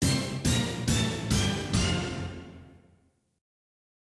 FX Hit Down
Just an effects sound made in FL studio